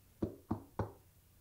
Muffled knocking on a door. Channel stripped in PT
door, foley, knock, knocks, muffled, spot